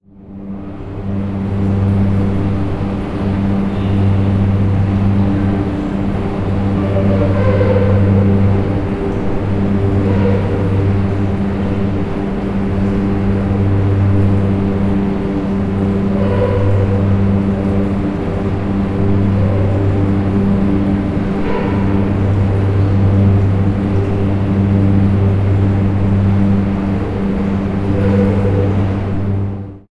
Metro Madrid Room Tone Ventilacion Escaleras Distantes